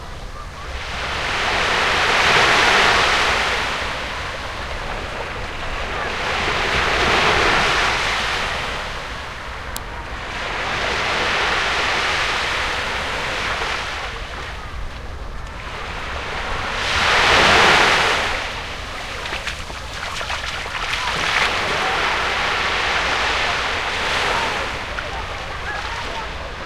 Recorded at Estepona beach (Malaga - Spain). You can hear sea shore and kids playing.
Used: Sony portable MD, Aiwa stereo mic.
beach, people, sea, shore